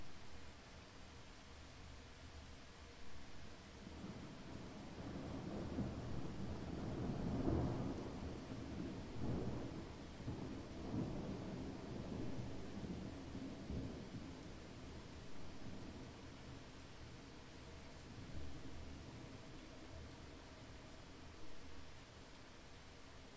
thunder noise 003
single thunder burst with light rain
field-recording, thunder